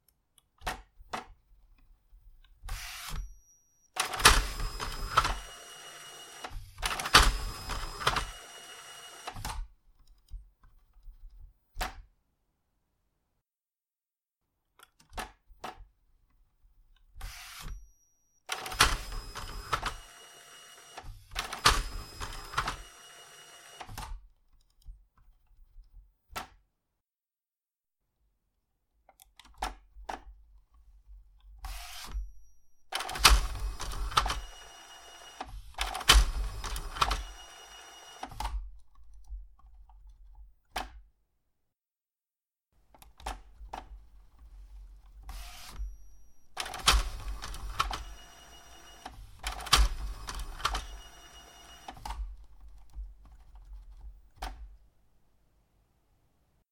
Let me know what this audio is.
Epson Printer Stylus D68 no ink cartridge - multiple takes
Old Epson printer has this ink-check procedure if one of cartridges is missing. The head goes back and forth while integrated circuits inside are testing cartridge response. I couldn't let this one go.
office, print, epson, fax, printer, ink, machinery, no-cartridge, printing, cartridge